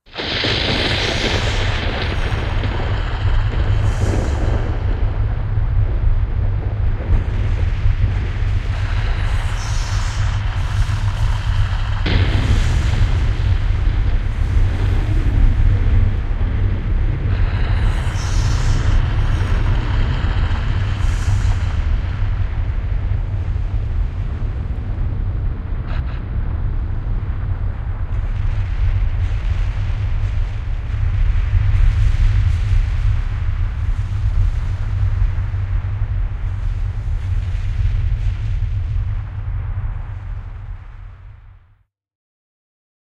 Earthquake prolonged sound recording